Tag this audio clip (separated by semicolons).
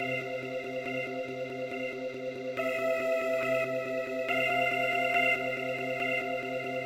guitar; drums; filter; free; sounds; loops